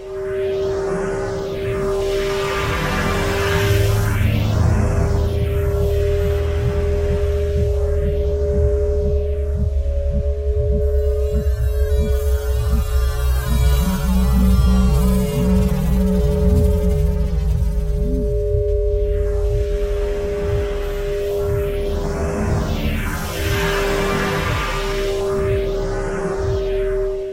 SciFi Loop
Ambient, Funny, Dub, Electronic, Sci-Fi, sound, Futuristic, Audio, Effect, Background, Synth, Spooky, Strange, Movie, Atmosphere, Weird, Space, Alien, Dubstep, Noise, eerie